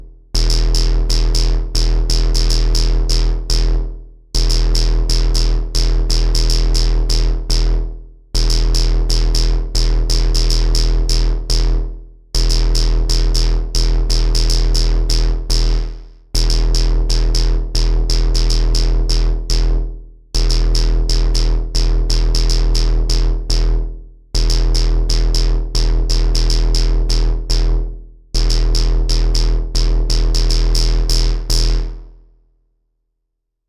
Some recordings using my modular synth (with Mungo W0 in the core)
Synth, Modular, Mungo, Analog, W0